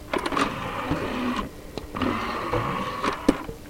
CD-Tray Old model
This is a recording i took of a old CD-Rom drive in my system.
It has the opening sound first then the button click then the retracting sound.
circa 1999 DVD-Rom player ripped from a old gateway computer that died.
I hope some of you make great use of this sound.
more will be coming.
Enjoy!
cd, machine, mechanical, rom, technological, technology, tray